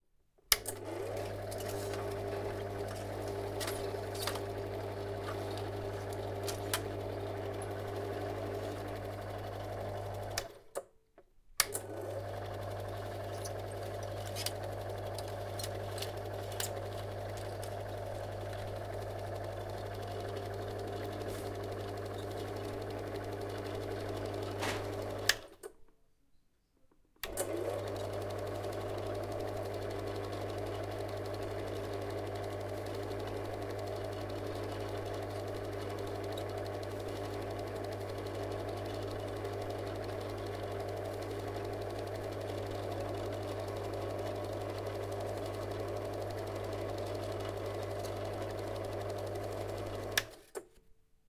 Switch on and switch off Super8 Meopta projector.